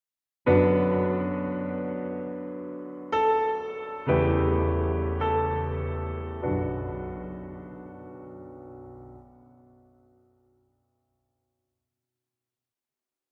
A very sad phrase in the style of Schubert, Liszt or contemporaries.